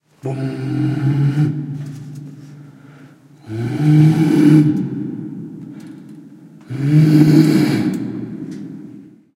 Mooing noise made with mouth, echoed. Primo EM172 capsules inside widscreens, FEL Microphone Amplifier BMA2, PCM-M10 recorder. Recorded inside an old cistern of the Regina Castle (Badajoz Province, S Spain)